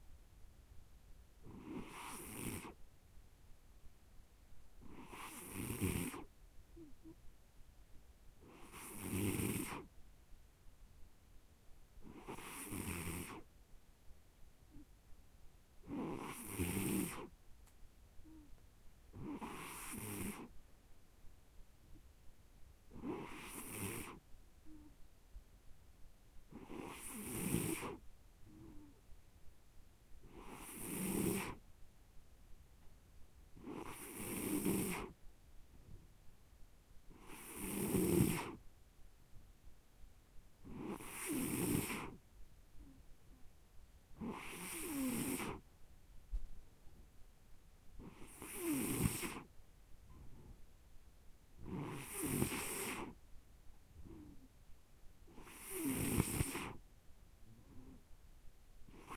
djidji snoring A84
gurgle, hum, humanbody, noise, snore, snoring